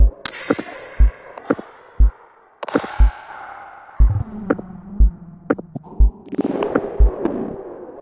Third sound in this series for dare 37:
Still at 120 BPM and should work well if used together with the first 2 sounds in this pack to build more complext rhythms / sequences.
Created from modified versions of the following sounds:
I find it actually amazing that so much sound variety has been created from such simple and short sounds.
Some of the sounds in this loop use a high amount of reverb provided by the free VST effect Glaceverb by Dasample.